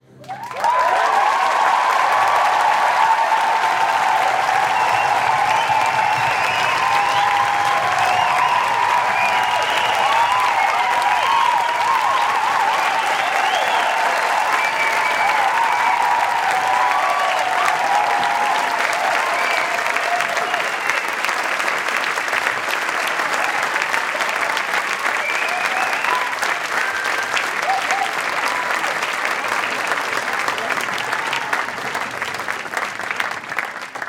Applause, enthusiastic, with cheering and some foot stamping
applause, audience, enthusiastic, foot-stamping, people, sheering